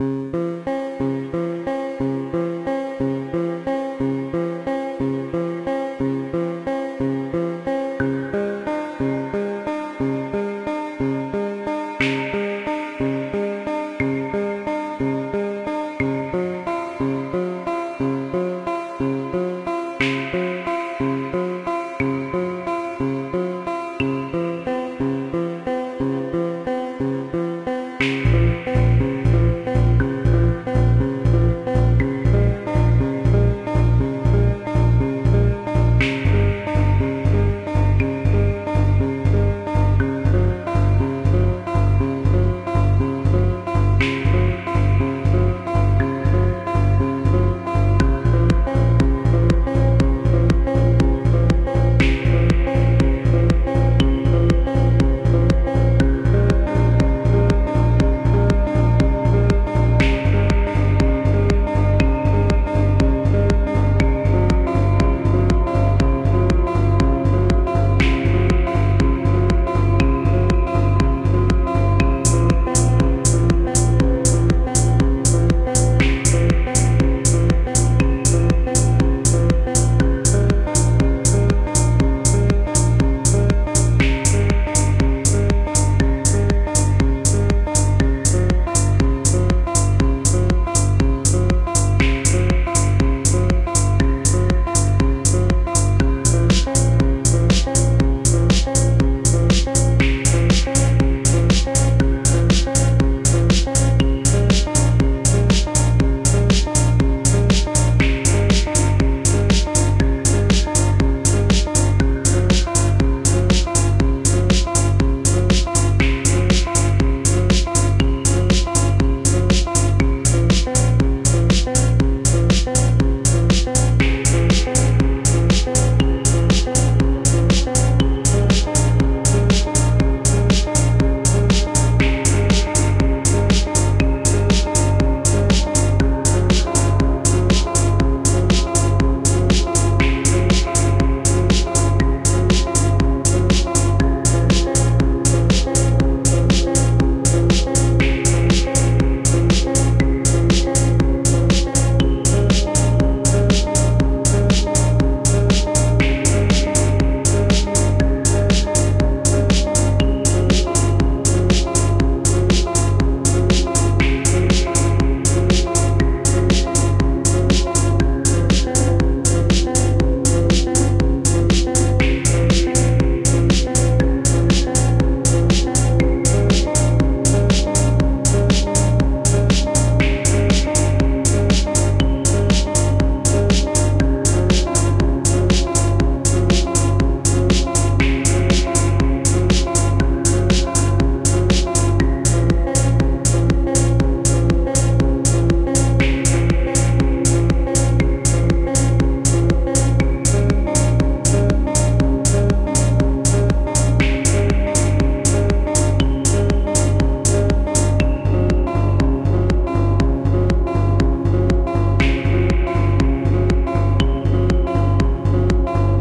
"Electronic Harp" music track
loop, rave, club, drum, techno, kick, sound, percussion-loop, bass, effect, beat, lead, ableton-live, pan, dance, house, music, ambient, electro, trance, synth, electronic